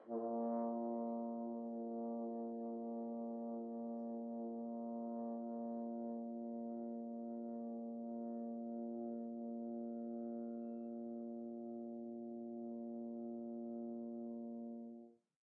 One-shot from Versilian Studios Chamber Orchestra 2: Community Edition sampling project.
Instrument family: Brass
Instrument: F Horn
Articulation: sustain
Note: A#2
Midi note: 46
Midi velocity (center): 2141
Microphone: 2x Rode NT1-A spaced pair, 1 AT Pro 37 overhead, 1 sE2200aII close
Performer: M. Oprean
asharp2, brass, f-horn, midi-note-46, midi-velocity-62, multisample, single-note, sustain, vsco-2